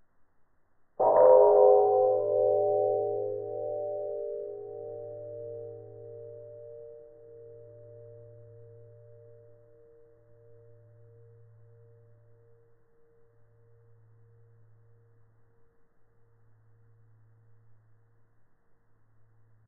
This version is 90% slower than the original. Edited in Audacity 1.3.5 beta
ding, bing